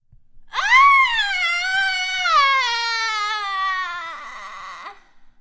Me screaming like a witch, as if she were melting.